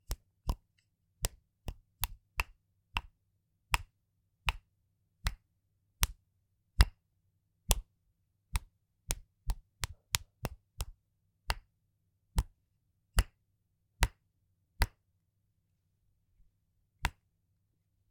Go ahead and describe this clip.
pencil hits
Hitting with a pencil.
Recorded with H5 Zoom with NTG-3 mic.
hit, 2b, goldfaber, pencil, hits